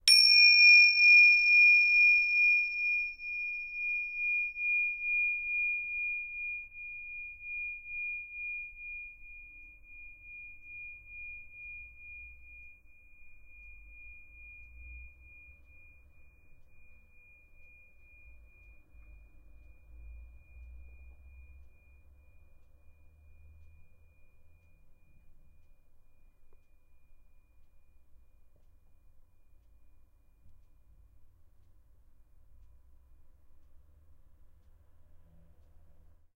Tibetan prayer bell /
Resonant peak frequency is about D#7 or 2427Hz